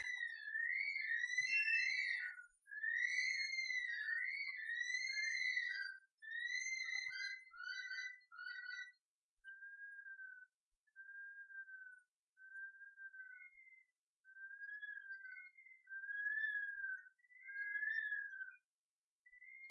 curlew 02 04 2009 final
The Bush Thick-Knee Curlew of North Queensland is comfortable in urban environments and spends the night patrolling territory giving off an most eerie cry that has become the lullaby for children raised in this part of the world. The audio was taken about 1am and in removing background noise it is a bit tinny, but the sound is quite true to my ear.
australia, bird, curlew